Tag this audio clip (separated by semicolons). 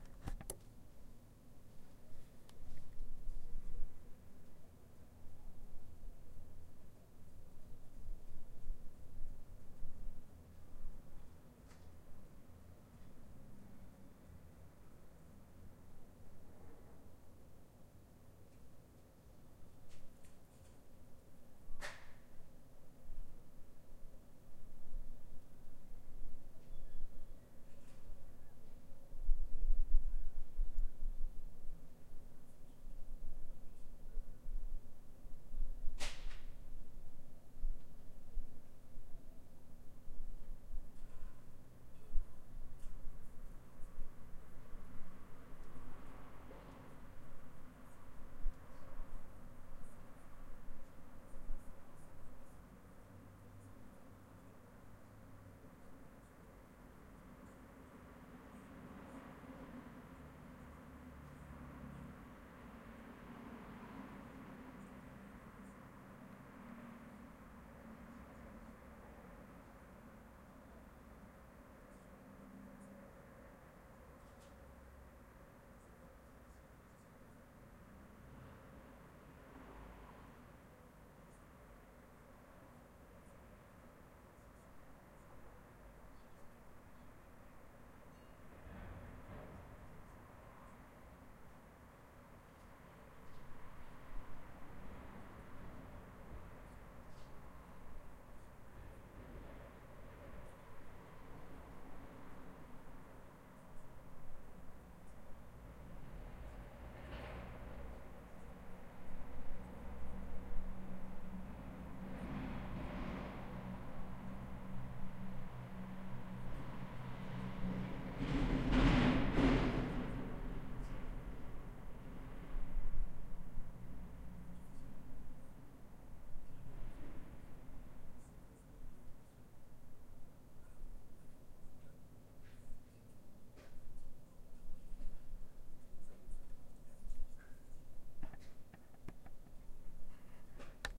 ambience; city; covid; curfew; downtown; field-recording; lockdown; quebec; quebec-city; soundscape